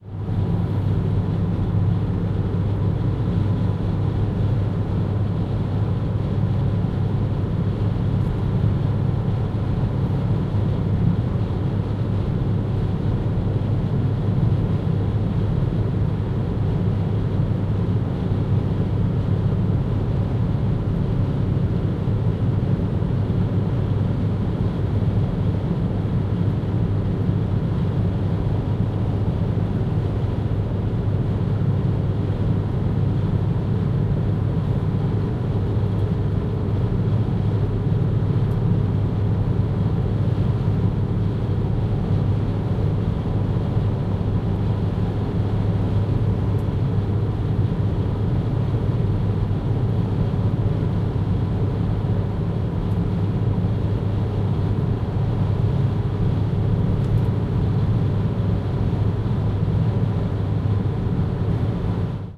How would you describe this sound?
Roomtone ParkingGarage Enschede Airco Rear
Rear recording of surround room tone recording.
roomtone, sounddesign, surround